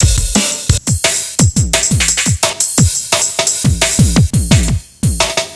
breaked loop !
made with a Roland MC-303 (this is not a factory pattern!)